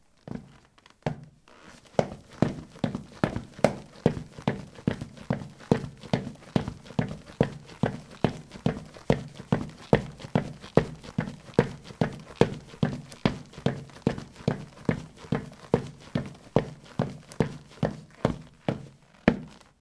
ceramic 18c trekkingboots run
Running on ceramic tiles: low sneakers. Recorded with a ZOOM H2 in a bathroom of a house, normalized with Audacity.